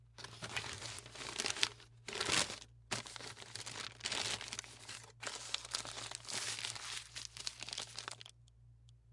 Paper Bag and Bottle Wrap Up FF390
Crinkling paper, wrapping a bottle in paper
bottle, Crinkling, paper, wrapping